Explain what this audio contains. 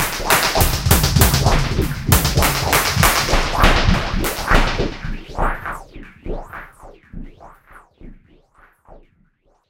20140316 attackloop 120BPM 4 4 Analog 1 Kit ConstructionKit ElectronicPercussion05
This loop is an element form the mixdown sample proposals 20140316_attackloop_120BPM_4/4_Analog_1_Kit_ConstructionKit_mixdown1 and 20140316_attackloop_120BPM_4/4_Analog_1_Kit_ConstructionKit_mixdown2. It is an electronic percussion loop which was created with the Waldorf Attack VST Drum Synth. The kit used was Analog 1 Kit and the loop was created using Cubase 7.5. Various processing tools were used to create some variations as well as mastering using iZotope Ozone 5.
120BPM, ConstructionKit, dance, electro, electronic, loop, percussion, rhythmic